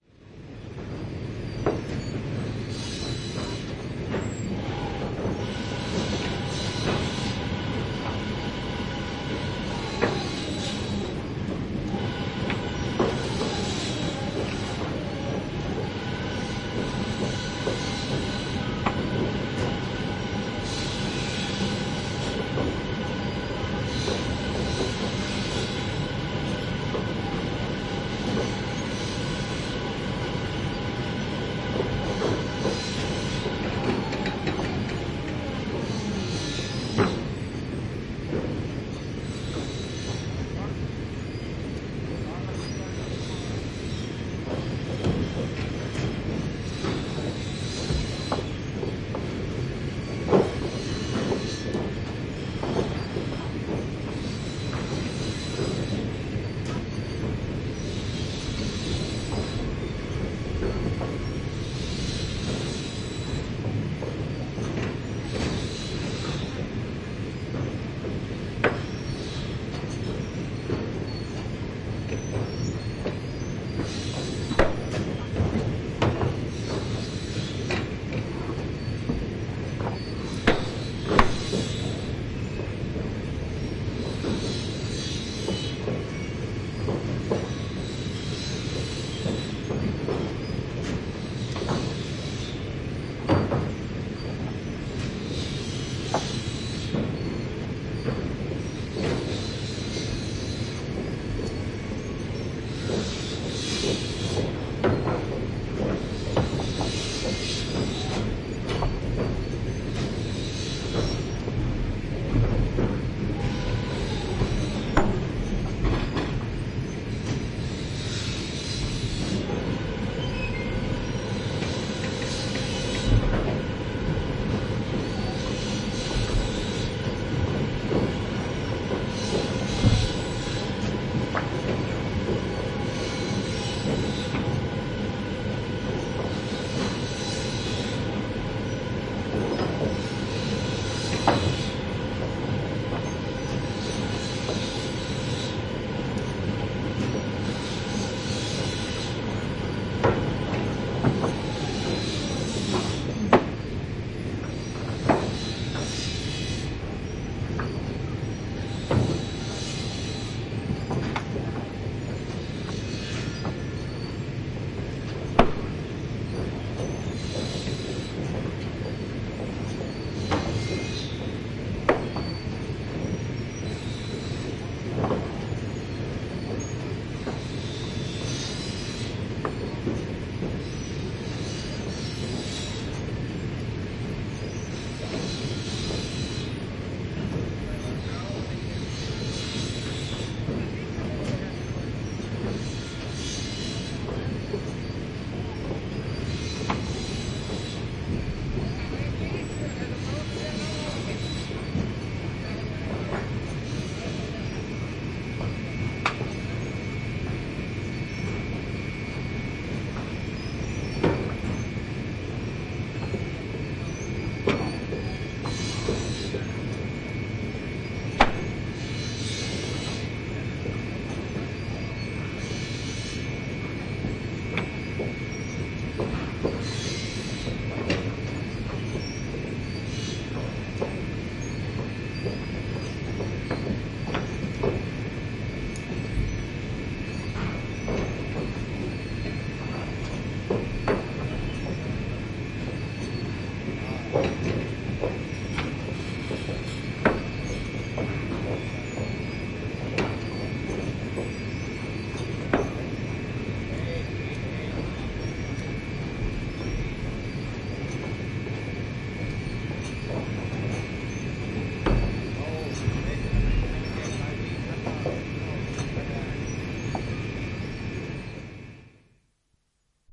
Saha, sahalaitos / Saw mill, 1970s. Sounds of timber being piled, clatter, various noises of the saw mill and a circular saw in the bg, some talk
Matkun saha, 1970-luku. Lautoja pinotaan vaunuihin, kolinaa. Taustalla sahalaitoksen hälyä, katkaisusirkkeli, vähän puhetta.
Paikka/Place: Suomi / Finland / Forssa, Matku
Aika/Date: 04.04.1974
Yleisradio, Suomi, Tehosteet, Yle, Field-Recording, Sahalaitos, Saha, Puu, Machines, Mill, Soundfx, Wood, Finnish-Broadcasting-Company, Saw-mill